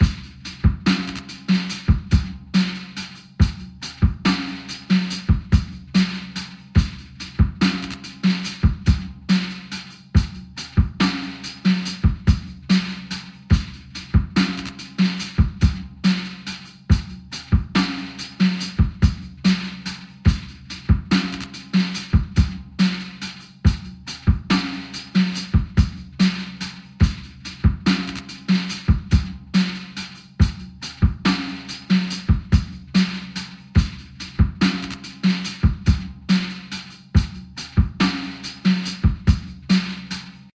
slow simple drum loop.
drum, drumloop, drums, slow